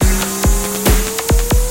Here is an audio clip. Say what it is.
are all part of the "ATTACK LOOP 6" sample package and belong together
as they are all variations on the same 1 measure 4/4 140 bpm drumloop. The loop has a techno-trance
feel. The first four loops (00 till 03) contain some variations of the
pure drumloop, where 00 is the most minimal and 03 the fullest. All
other variations add other sound effects, some of them being sounds
with a certain pitch, mostly C. These loop are suitable for your trance
and techno productions. They were created using the Waldorf Attack VSTi within Cubase SX. Mastering (EQ, Stereo Enhancer, Multi-Band expand/compress/limit, dither, fades at start and/or end) done within Wavelab.